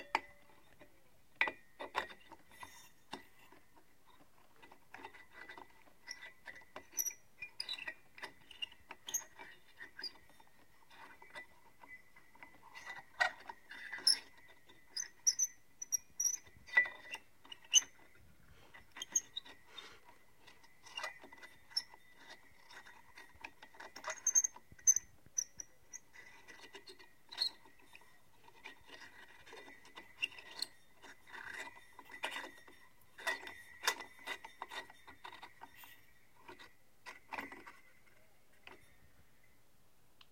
Screwing in a light bulb
Screwing in a lightbulb into an old, rusted lamp